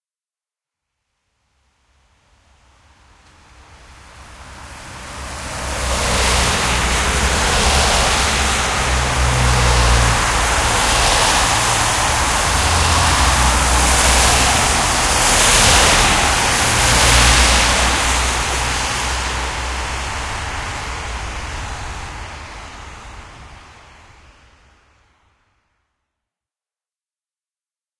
Cars drive by on wet street
A field recording of cars driving by on a wet street.